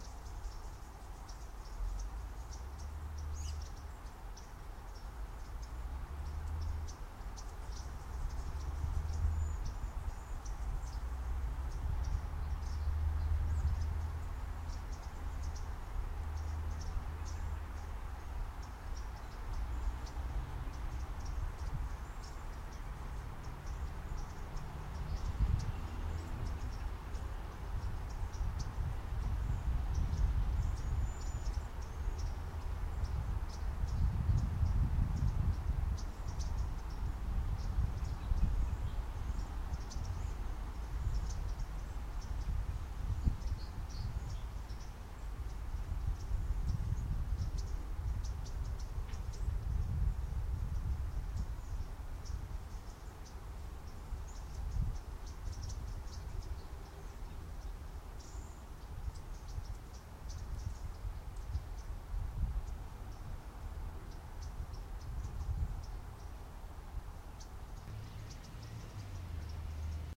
A field recording created while sitting in a eucalyptus grove, beneath a canopy of native California birds, in the middle of Golden Gate Park, San Francisco. Geotag is an approximate location.